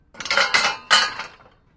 Metal handling bars in container 6
Metal handling bars in container
container, handling, bars, Metal